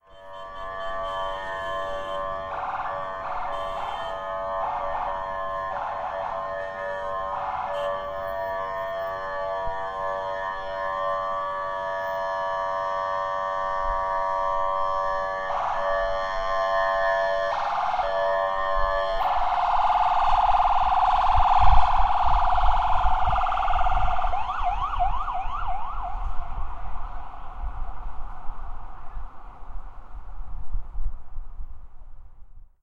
ambulance siren drone horn
NYC ambulance stuck in traffic, with drone horn and siren.